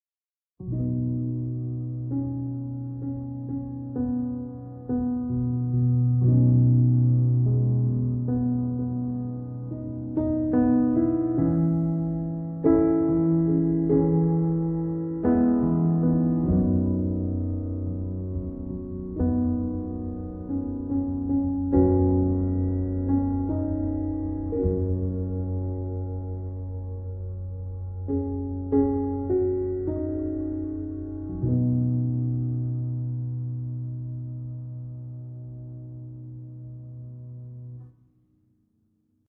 Light Piano Noodling in B
improvising, light, noodling, peaceful, phrase, piano, sustain